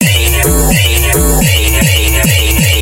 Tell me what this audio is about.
Electro Eye
A Chopped Sample of that 2010 track i made
Sample, Club